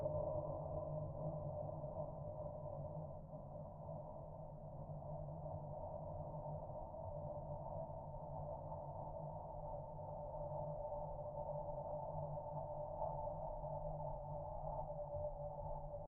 Void of a Black Hole (fictional)
A production sound created for a film project where the character is looking into the void of a Black Hole - and the emptiness overwhelms. The track is rooted in me slowly exhaling across the microphone. A flanger and low-pass filter is responsible for the rest!
Created on 04/17/2021 with an H4n and Sennheiser Shotgun Mic.
emptiness, hole, void, black, empty